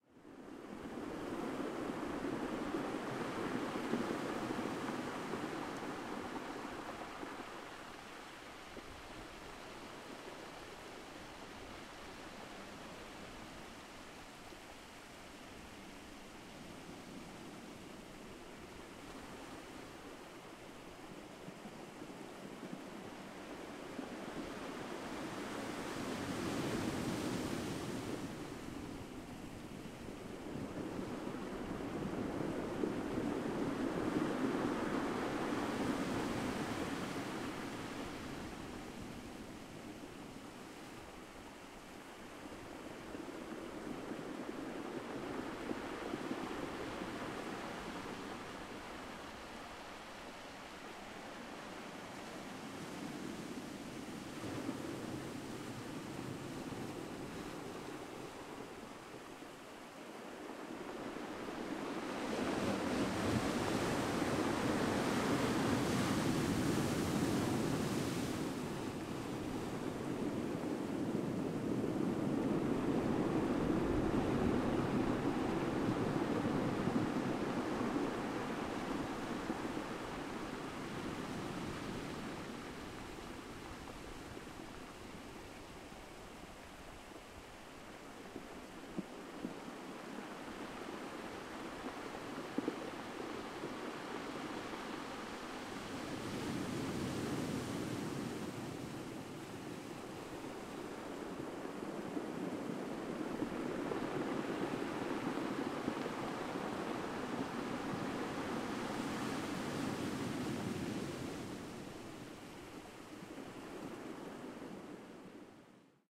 Beachbreak cobblestones
Waves rolling at madeira beach with cobblestones
Recorded with Rode VideoMicro and Rodeapp in iPhone
wave, sea, breaking-waves, field-recording, seaside, seashore, surf, atlantic, crickets, rocks, cobblestones, madeira, water, sea-shore, shore, night, waves, nature, tide, splash, coast, ocean, beach